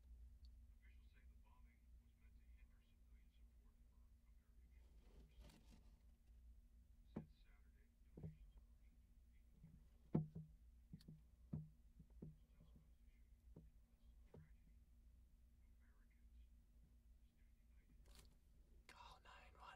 Light Footsteps
Someone stepping lightly on a wooden floor. A TV news broadcast plays in the background.